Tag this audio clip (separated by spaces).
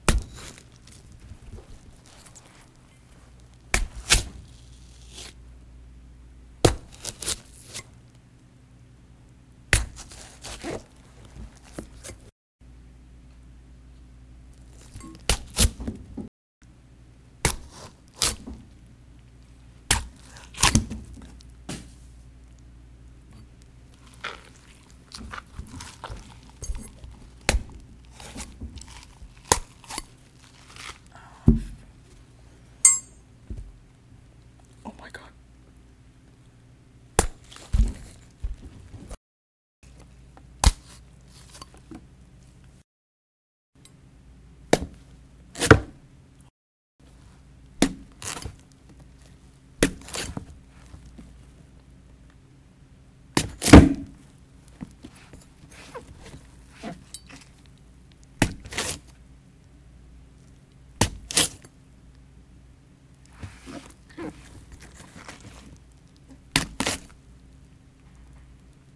flesh violence gore horror slasher horror-fx splat knife stabbing foley blood death bloodsplat horror-sfx horror-effects